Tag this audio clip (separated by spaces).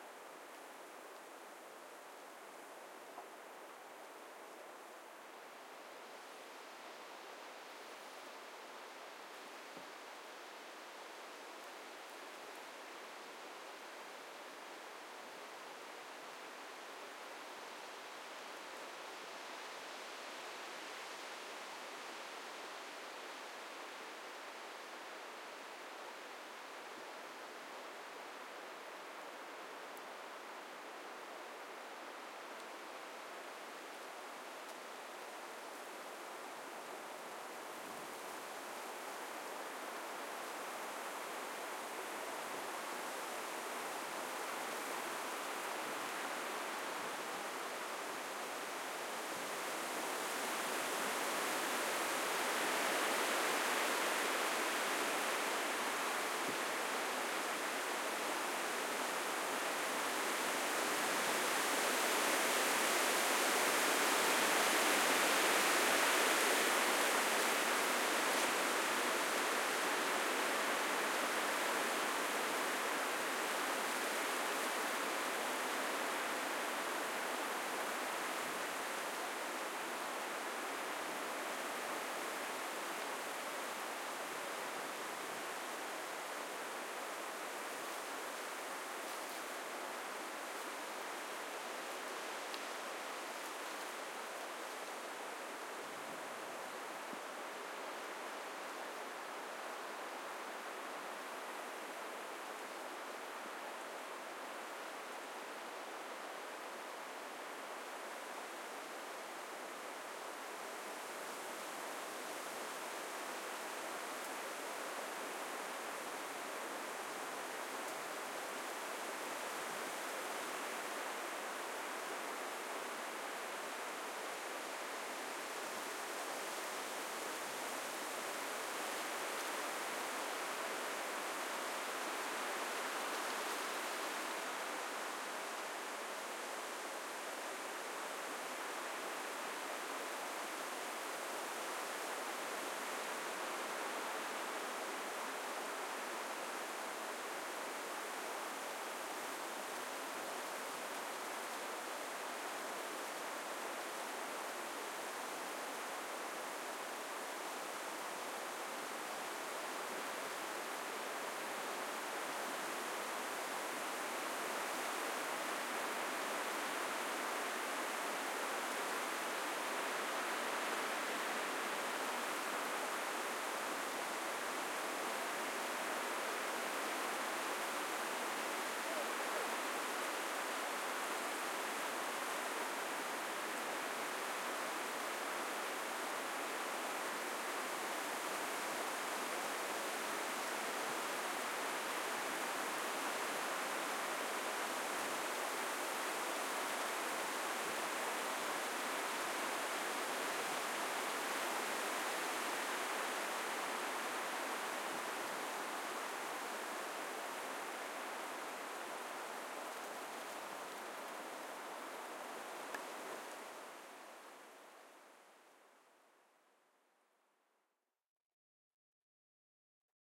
ambience ambient field-recording forest h4n leaves nature noise Oskarshamn pro Sweden trees weather wind windy wood woods Zoom